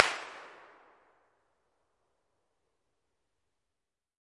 Winter Forest 04
Impulse responses of the forest in winter, taken from about 20 to 100 ft from the mic, made with firecrackers.